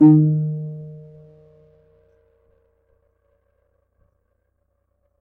my mini guitar aria pepe